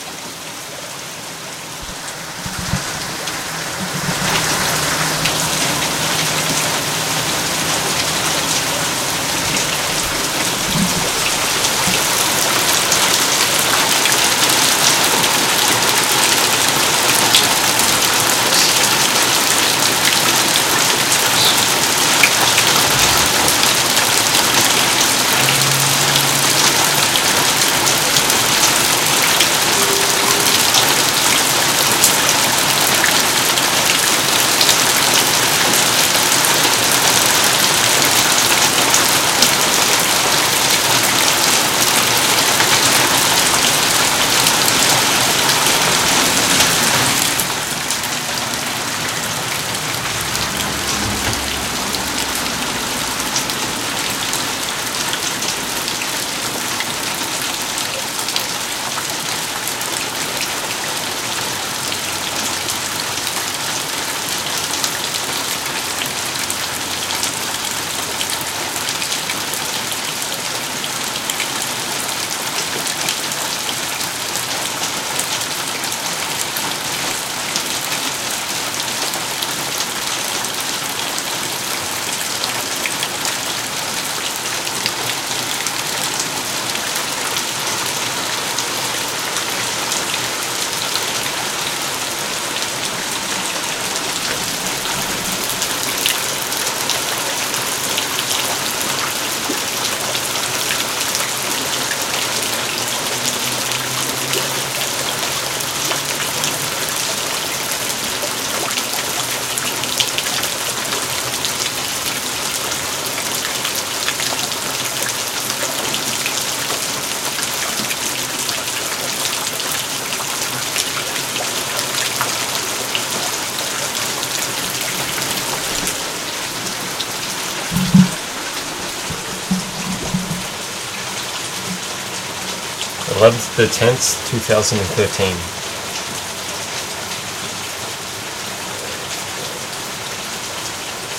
This is a recording right next to water falling into a bucket while its raining.
I have a second recording of it been recorded at about 5 feet away that is also uploaded. Enjoy.